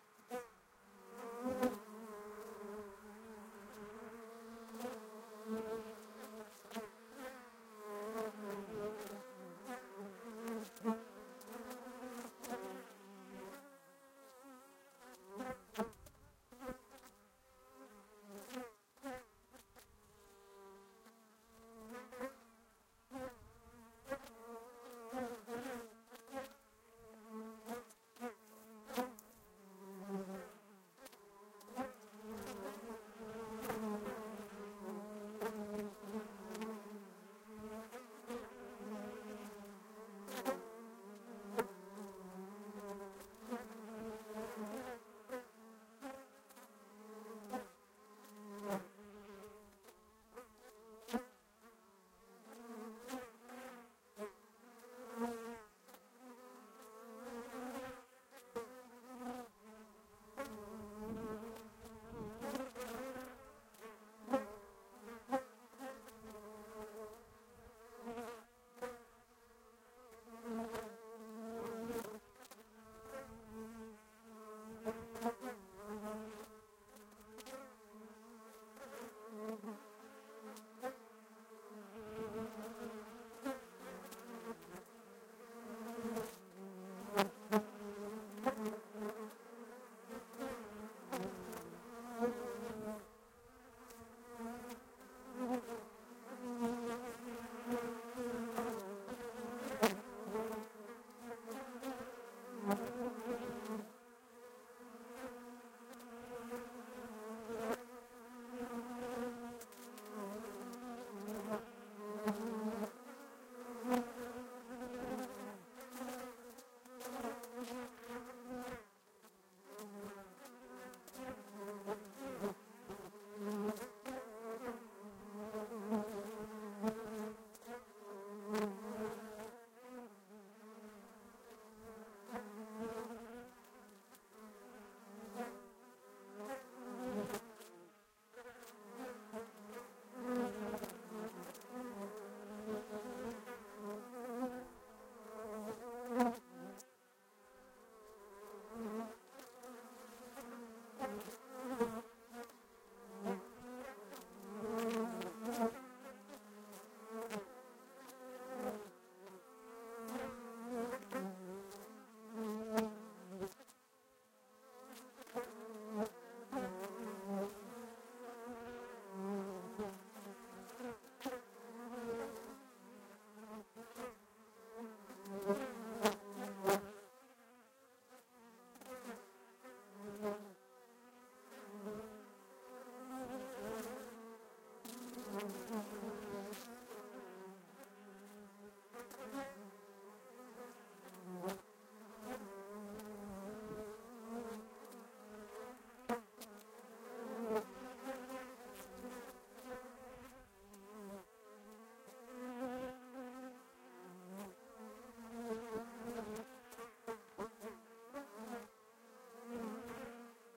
20080127 1300 Panales abejas cerca
Field recording made placing binaural microphones close to a honeycomb. Recorded in Barajas de Melo. HiMD. Soundman OKM II. 27/01/1008. 13:00.
honeycomb, bees, binaural, traditional-craft